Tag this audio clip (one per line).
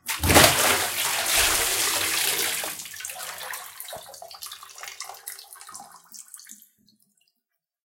bath
bucket
drops
hit
splash
water